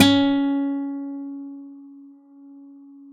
A 1-shot sample taken of a Yamaha Eterna classical acoustic guitar, recorded with a CAD E100 microphone.
Notes for samples in this pack:
Included are both finger-plucked note performances, and fingered fret noise effects. The note performances are from various fret positions across the playing range of the instrument. Each position has 5 velocity layers per note.
Naming conventions for note samples is as follows:
GtrClass-[fret position]f,[string number]s([MIDI note number])~v[velocity number 1-5]
Fret positions with the designation [N#] indicate "negative fret", which are samples of the low E string detuned down in relation to their open standard-tuned (unfretted) note.
The note performance samples contain a crossfade-looped region at the end of each file. Just enable looping, set the sample player's sustain parameter to 0% and use the decay and/or release parameter to fade the
sample out as needed.
Loop regions are as follows:
[200,000-249,999]:
GtrClass-N5f,6s(35)